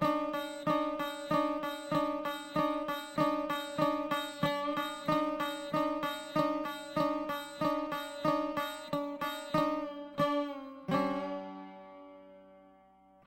sarod loop2
Sarod w/no processing. loop
world, sarod, raga, indian